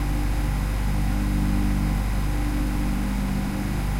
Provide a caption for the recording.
mrecord15 pc 2 lp
A loop of the sound my computer makes while running.
computer, pc